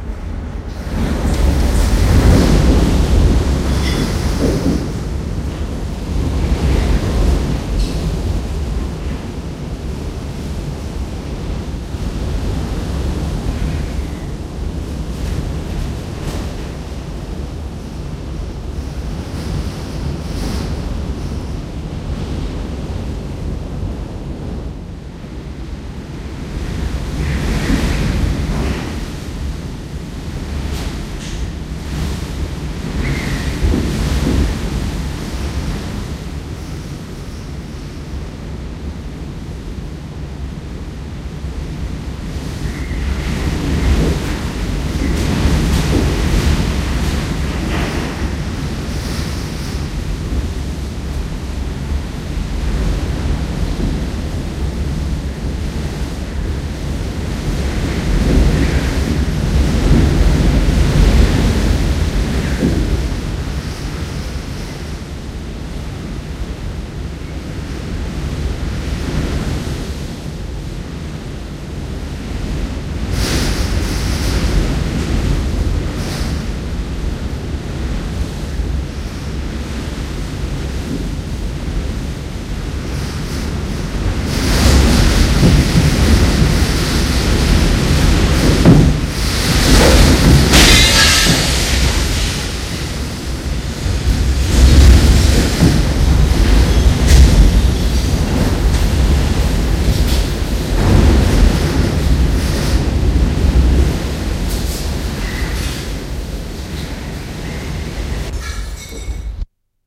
CYCLONE HURRICANE HUGO 1989
Real Hurricane sound recorded inside a big house in GUADELOUPE Island in 1989 from a D.A.T. recorder.
Heavy Wind and smash door window.
GLASS, HEAVY, HURRICANE, SMASH, WIND